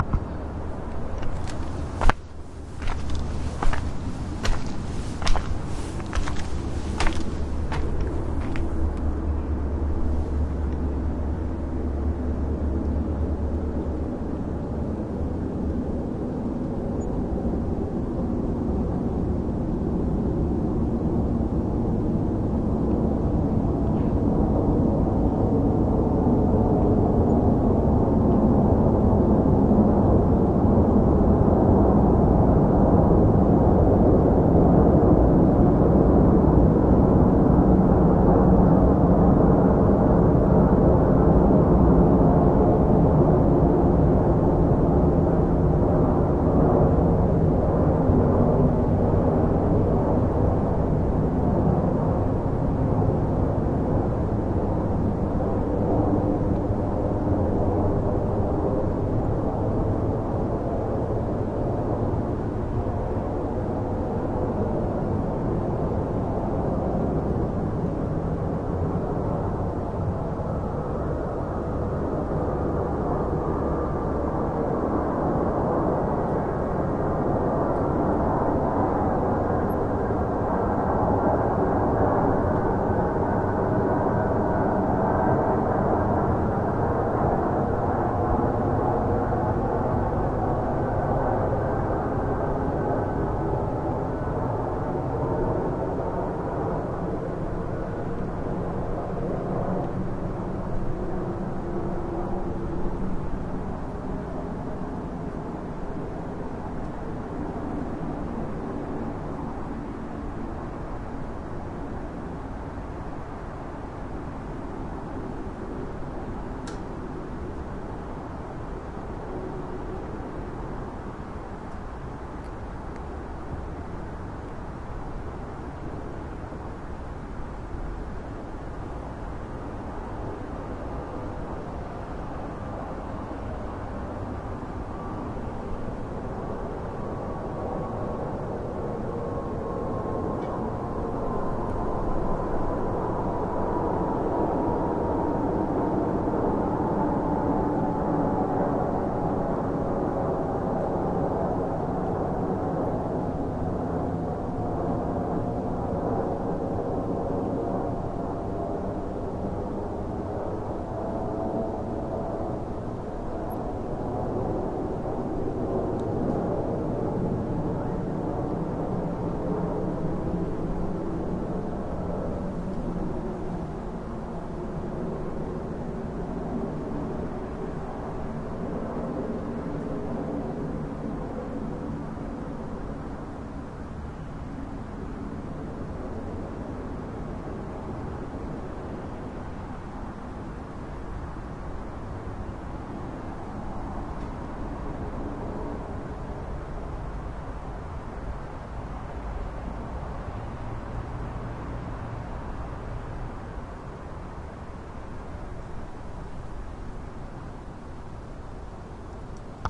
Residential Night Plane Overhead 4
clair, st, quiet, night, flightpath, toronto, street, ambience